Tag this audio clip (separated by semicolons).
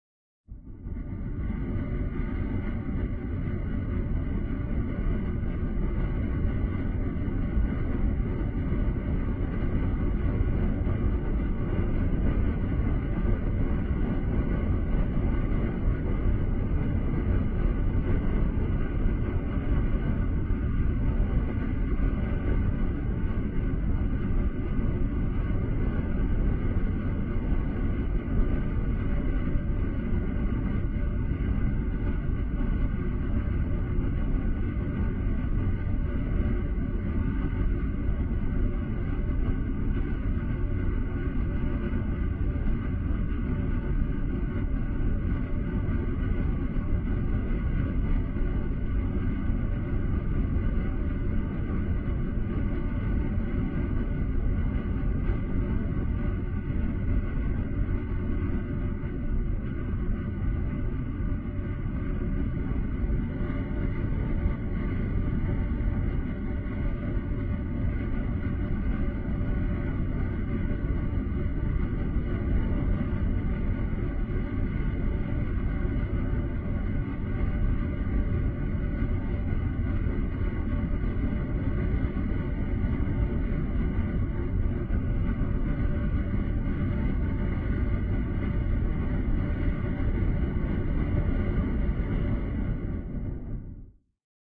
door; lamp; noise